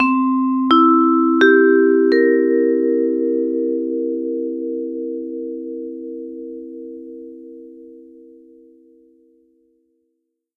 Vibraphone Transition Music Cue
This is a short transitional music cue I made using a vibraphone. This could be used in a cartoon or a story or something. It can be used as an audio cue in between scenes.